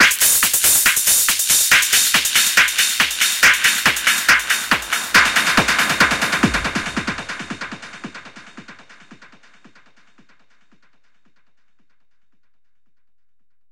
TECHNO BREAK
ride distortion eq fx. there compression vst is good gives clean sound. some pro producer friends use them.